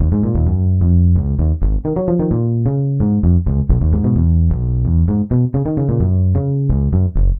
130BPM
Ebm
16 beats
Logic, Sculpture, Synth